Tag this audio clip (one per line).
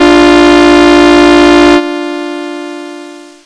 horn
effect
sound
casio
magicallight
bleep
sample